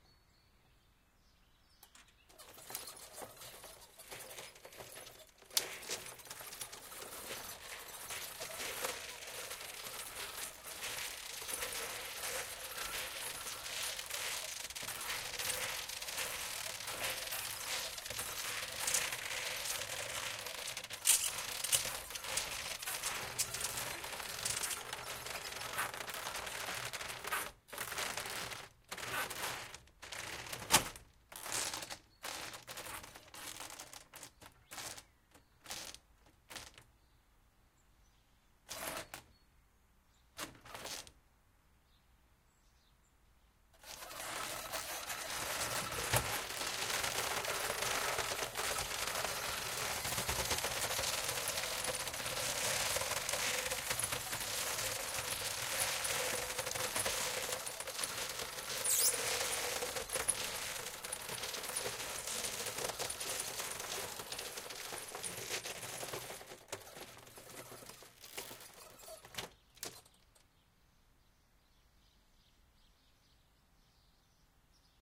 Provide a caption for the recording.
30 Years old Shutter Blinds Sound Effect
We have 30 years old window blinds around the house and I recorded the Up and Down from the outside of the building with my Zoom H6 in 92/24 HD!
windowblind, windowsound, squeezing, open, scary, shutters, pulling, lifting, sliding, blinds, window